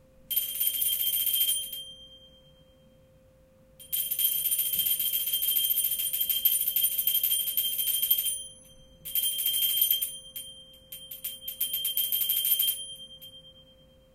Small Bell Ringing
A recording of me shaking an old hand bell. Recorded with a Zoom H4N.
bell, hand, ringing, shaking